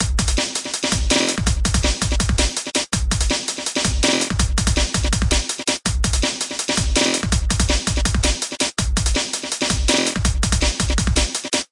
Bpm 164 DnB
Simple beat i was working on to help me practice on my kit.